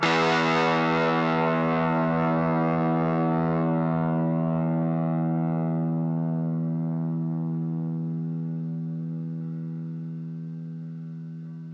Two octaves of guitar power chords from an Orange MicroCrush miniature guitar amp. There are two takes for each octave's chord.
miniamp, chords, power-chords, distortion, guitar, amp